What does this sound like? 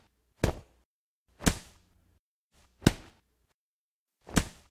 This is a sound of realistic punches created with very naughty wet beaten celery
fight, punch, realistic